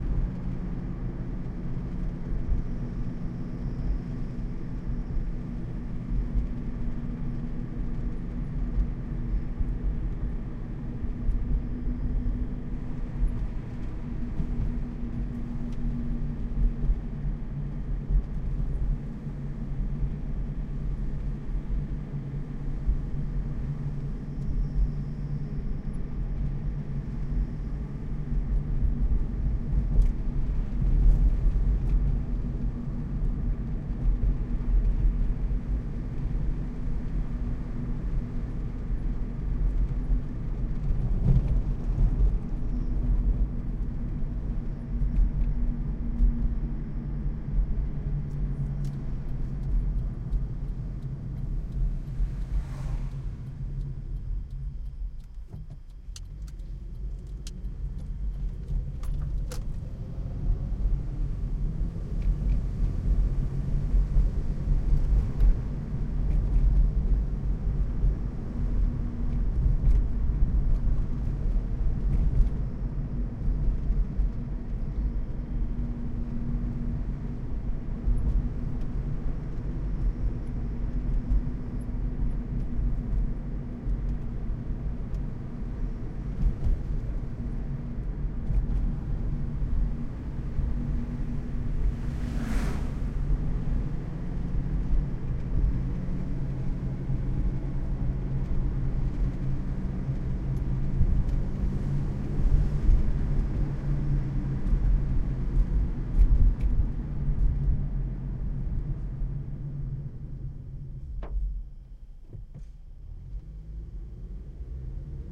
LR REAR INT CAR DRIVING SUMMER EVE WINDOW OPEN CRICKETS
This a summer evening recorded from the interior of a driving car with the windows open. This was recorded with an H2 in 4 channel mode. This is the rear pair.